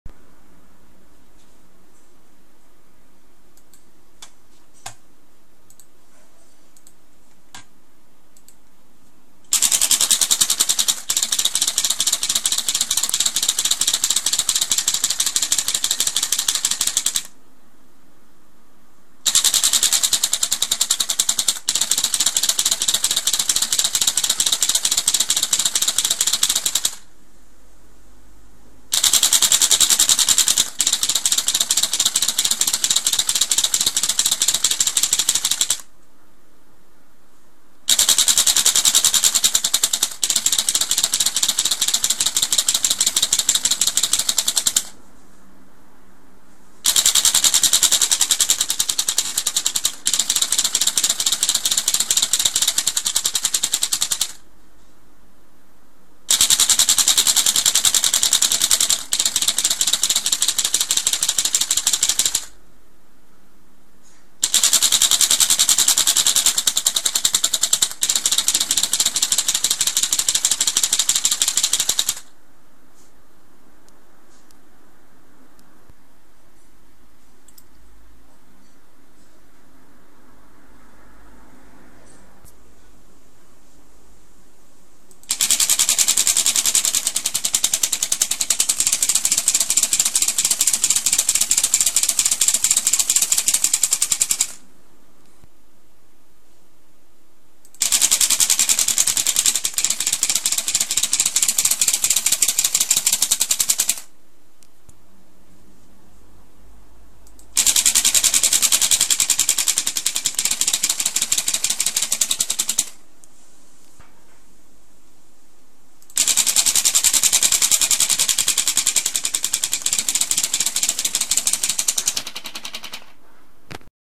Split Flap Display
Split display flap like you would find at a train station for arriving and departing trains, or a clock readout.